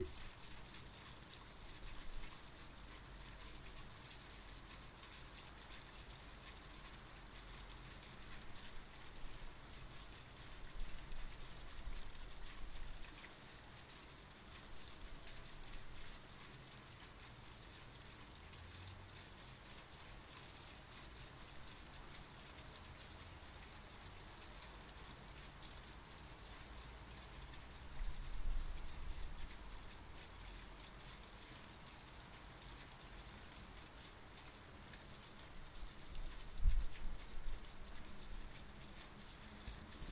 Autumn rain through drain pipe
This is a recording of Autumn rain falling down and pouring through a drain pipe outside my bedroom window. It is a very pleasant sound if I may say so, and can be used for many soundscape or atmosphere oriented things.
Recorded with Zoom H1.